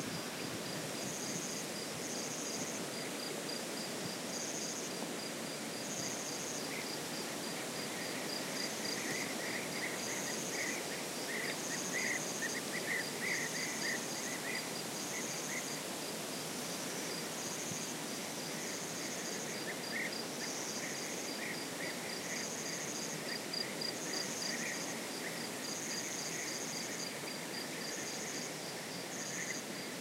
20060706.afternoon.scrub
This was recorded during a summer afternoon, still with enough sunlight. You can hear cicadas than begin to give up and are replaced by crickets, some bird calls (beeaters) on a background of wind on eucalyptus trees
insects, field-recording, ambiance, wind, summer, birds, nature